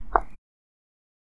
stone footstep 2
Footsteps on stone recorded with a Zoom Recorder
footstep, walk, step, feet, foot, field-recording, steps, stone, footsteps, walking